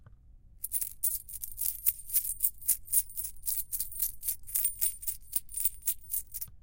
change being rattled
change, trace, yes